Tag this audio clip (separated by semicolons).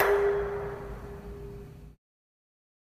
bottle; clang; clank; copper; ding; dispose; foley; hit; impact; iron; metal; metallic; pail; pan; pang; percussion; percussive; pot; rhythm; sound; steel; strike; tin; ting